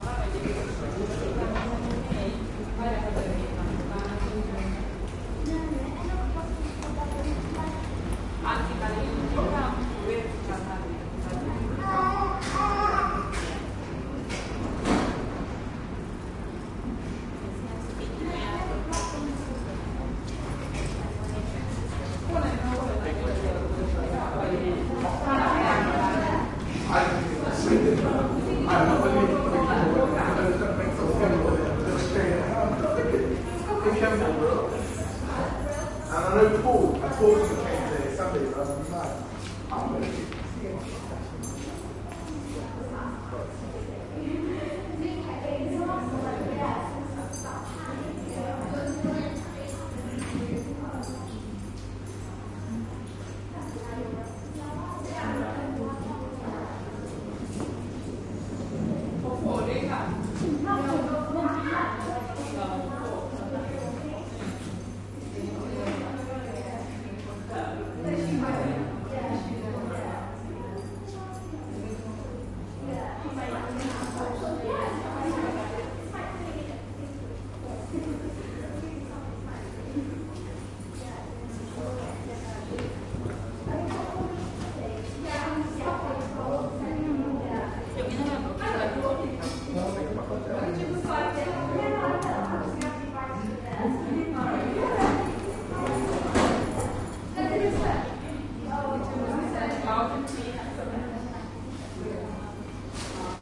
people chatting background
People chatting on a station platform.